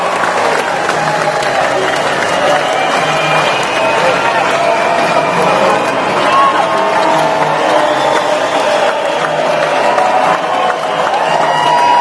Concert cheer
sounds from a concert